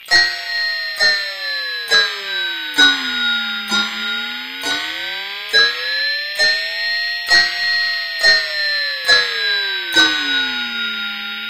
old toy piano
strumming the strings of a fender strat above the nut, then some of the usual digital tinkering. sounds like someone banging the keys on one of those old toy mini-pianos.
antique, guitar, ghost, piano, vintage, creepy, old